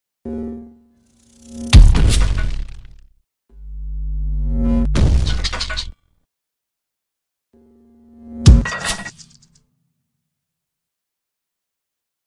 GUT-A-BLASTIX
electric nailgun sound for a friends vr game
blast, boob, electric, guts, nailgun, shwabang